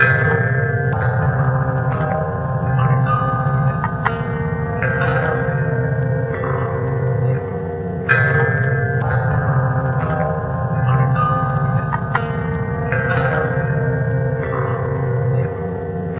Acid Trip in the Far East
rhythm, world, processed, loop